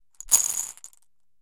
marbles - 15cm ceramic bowl - shaking bowl ~10% full - ~13mm marbles 03
Shaking a 15cm diameter ceramic bowl about 10% full of approximately 13mm diameter glass marbles.